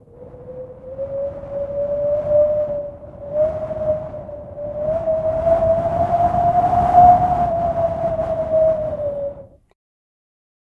Wind Arctic Storm Breeze-019
Winter is coming and so i created some cold winterbreeze sounds. It's getting cold in here!
Arctic, Breeze, Cold, Storm, Wind, Windy